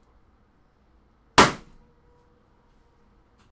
balloon pop 01
balloon, bang, explode, pin, pop